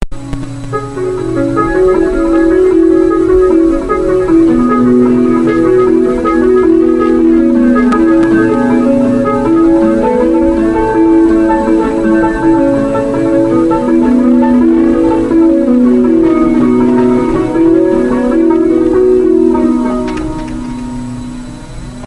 A Piano rif played by me and edited by me. Played in C#m, it is a basic melody with no technical styles.
alteration, ambience, piano, cm